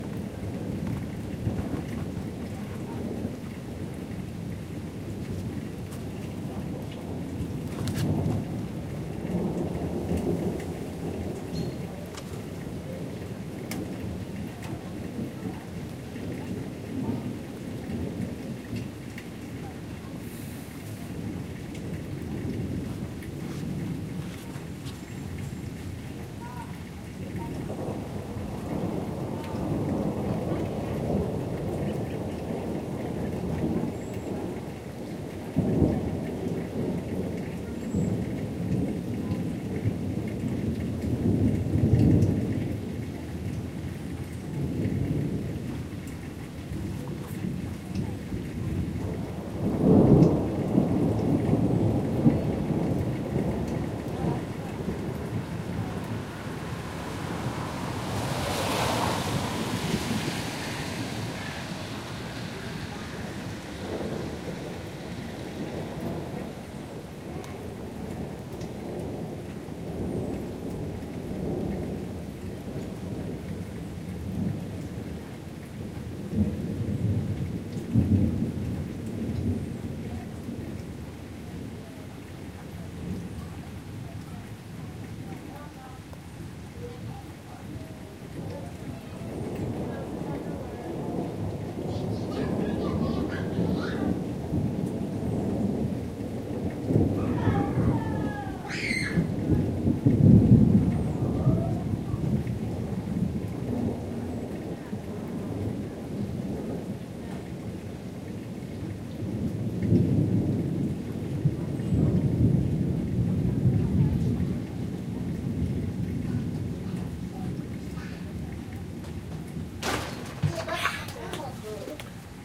Storm Rain from Porch perspective
Storm Rain from Porch
recorded on a Sony PCM D50
xy pattern
from, Porch, Rain, Storm